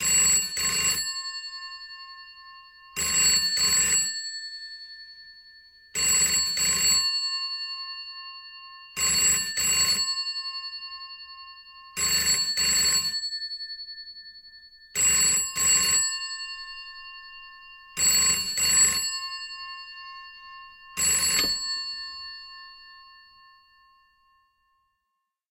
Old Phone 01
Restored vintage Ericsson telephone. Recorded with SE RN1 and Sound Devices 664.